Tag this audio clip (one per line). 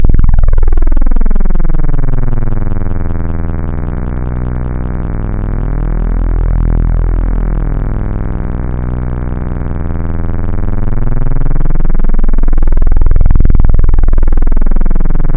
detune
reese
saw